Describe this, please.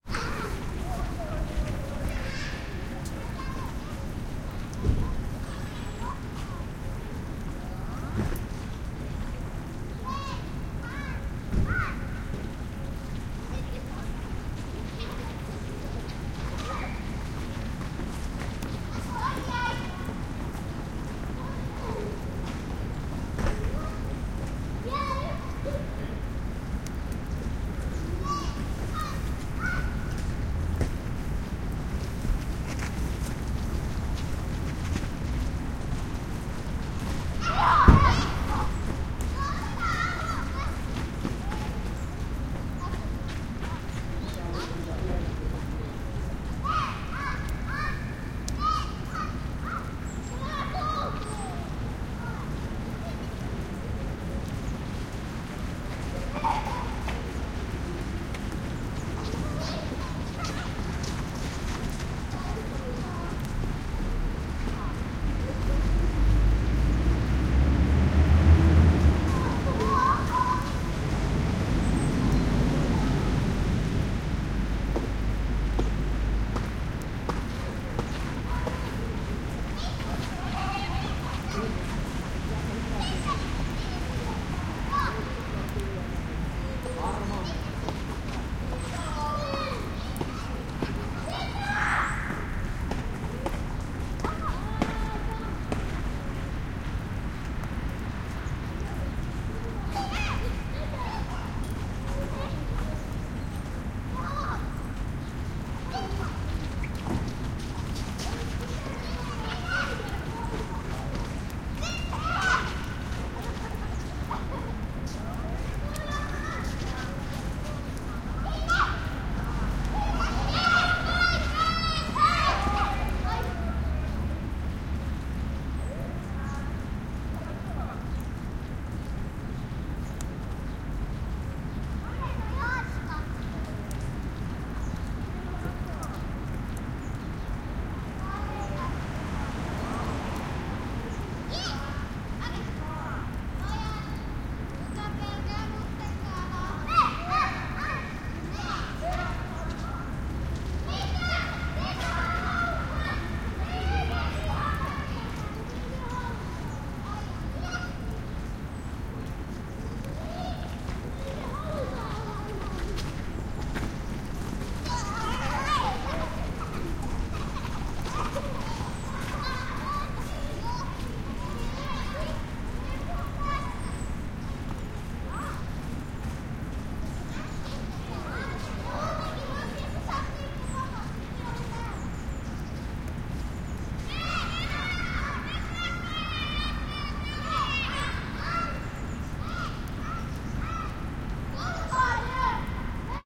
Schoolyard-Helsinki-spring
A schoolyard in suburb of Helsinki. One class has a break outside, probably agegroup in 7-9. Spring 2020, light rain almost mist, some birds and traffic. Occasional drip of rain from a tree above. Reflections from nearby buildings, faint rumble of main street in background.
Stereo AB pair Line Audio CM3 -> Tascam HD-P2 -> normalization
ext, helsinki, field-recording, atmo, kids, traffic, urban, birds, school